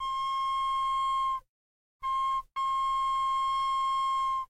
61 Tren Flauta
Cuando un tren pita la su flauta
de, flauta, tren